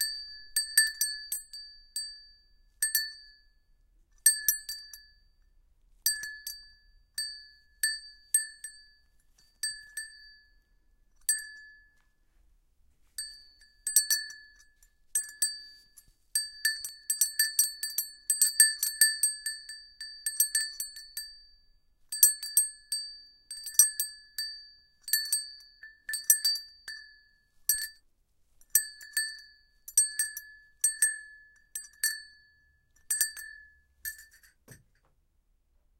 Studio recorded bell microphone schoeps Ortf mixed with Neuman U87
Bells, Foley, Studio